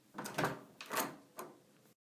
Opening Door Handle
Opening a metal door handle.
creak, door, doorhandle, handle, metal, open, squeak, wood, wooden